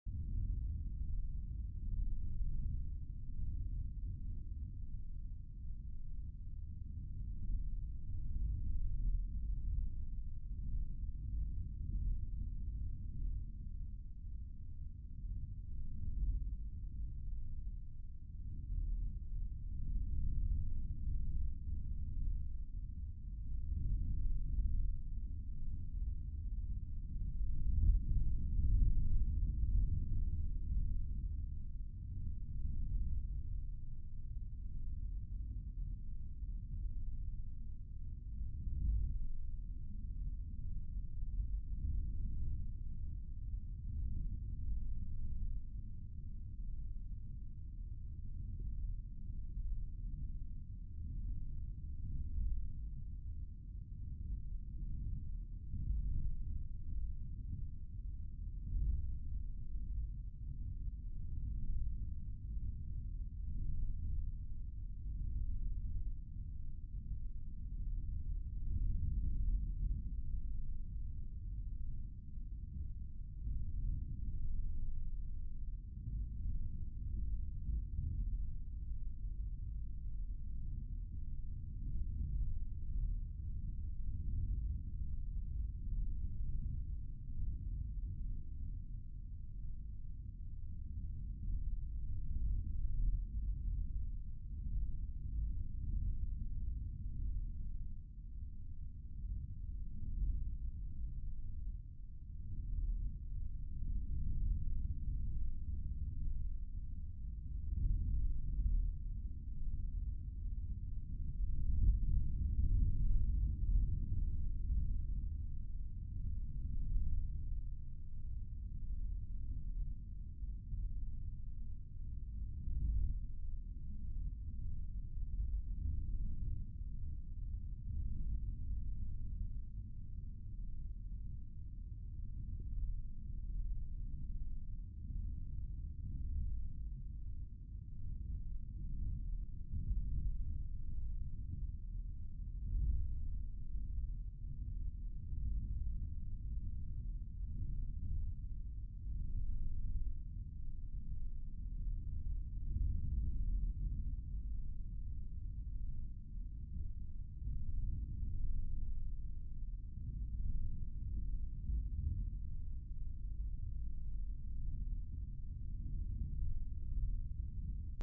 Wind 5 Borg Bass
this sound is from a field-recording in the peninsula de Paraguaná, Edo. Falcon, Venezuela.
It is basicaly wind hiting the mic´s diafragma, equalized at a very low frequency.
ghost, ambiance, wind, ambient, field-recording, bass, soundscape, atmos, ambience, atmosphere